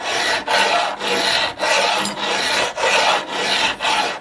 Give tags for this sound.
Crash
Tools

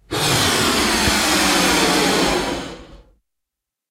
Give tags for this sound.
balloon
inflate

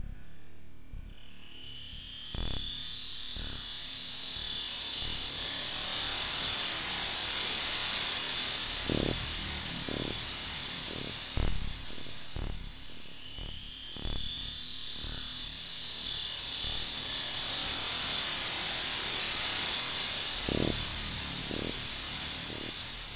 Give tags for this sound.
creepy
horror
Disturbing
sounds
audacity
scary
weird
spooky

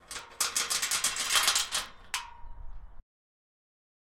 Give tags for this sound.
gate
chains
effect
OWI

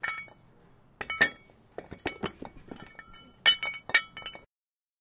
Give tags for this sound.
field-recording
OWI
weights

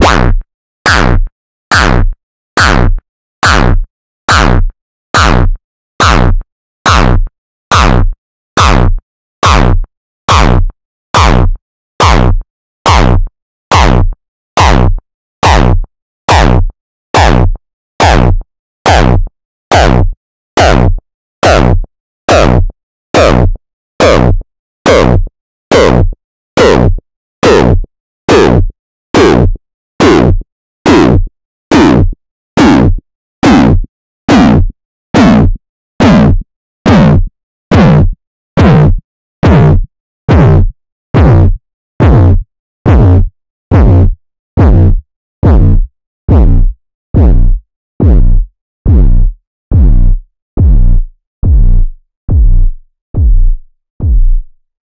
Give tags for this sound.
dark
hard
synthesized
aftershock

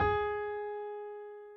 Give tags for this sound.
do
fa
keyboard
keys
la
mi
music
Piano
re
so
ti

